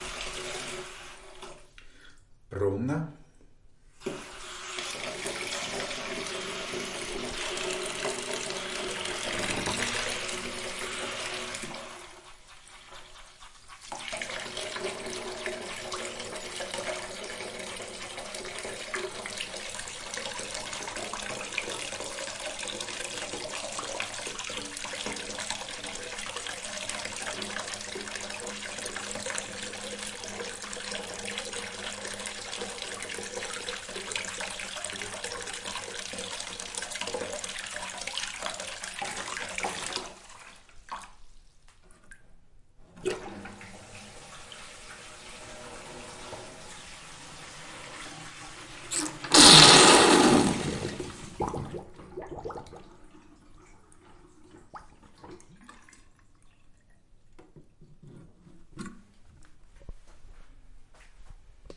playing with water in a sink